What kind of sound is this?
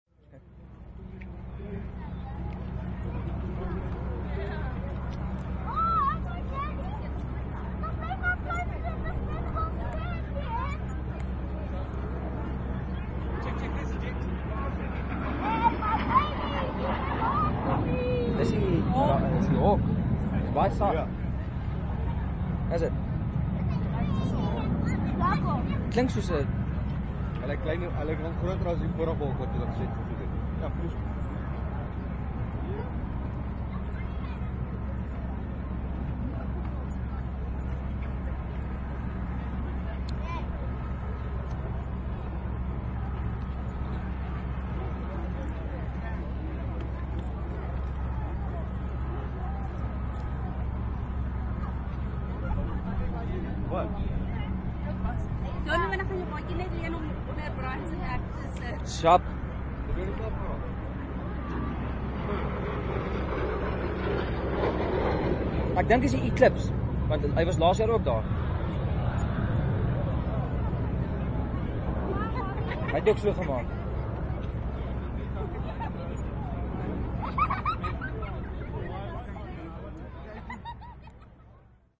This is the first of two clips about the Eclips jet, proudly presented by the South African air force.
aircraft, flight, military, fighter, south-africa, jet, quiet, air-force